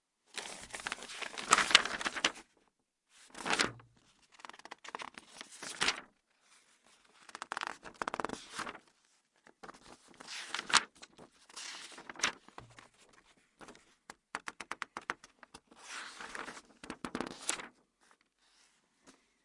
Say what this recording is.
going through the papers
Recorded clean for a Radioplay - Behringer B10 on Yamaha 01v/Adobe Audition